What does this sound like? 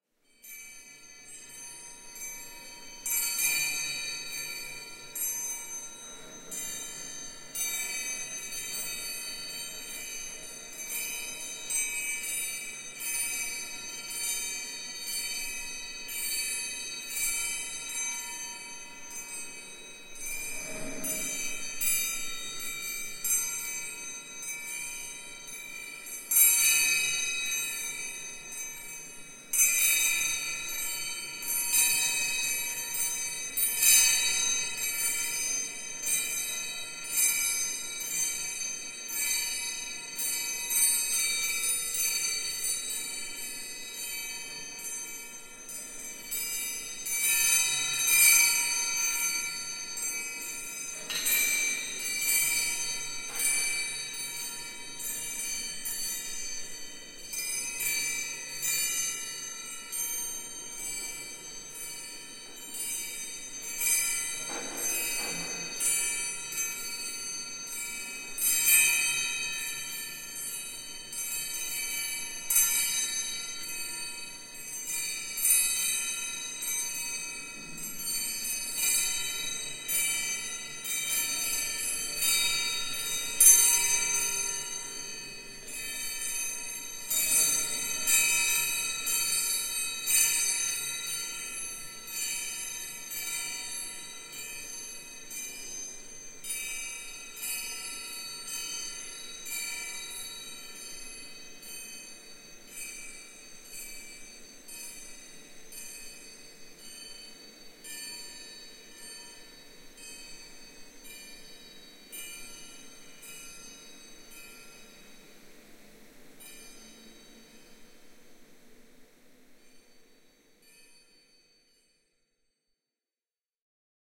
Metal tanging sound, made with skewers & slightly processed